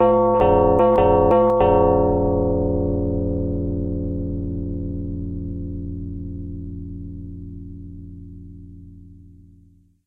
The person's getting tired of waiting for you to come answer the door already.